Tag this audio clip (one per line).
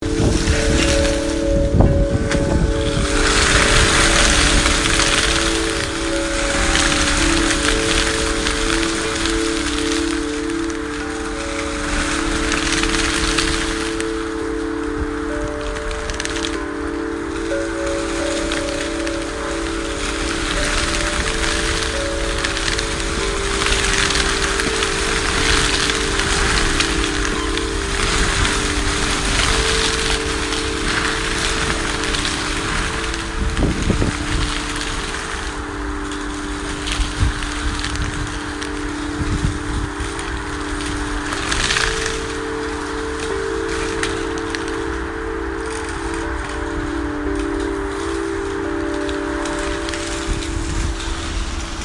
ambience
chimes
field
iPhone
lo-fi
low-fidelity
nature
noisy
recording
wind